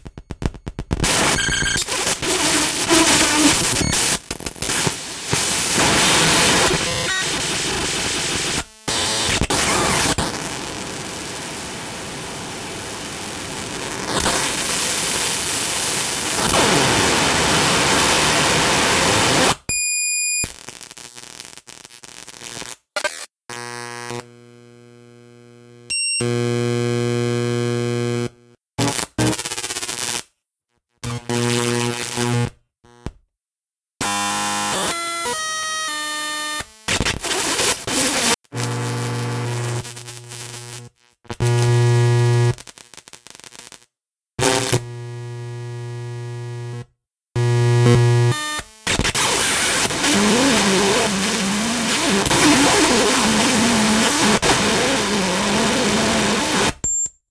ps electric
This sound was once a photoshop file.
beep, computer, data, digital, harsh, noise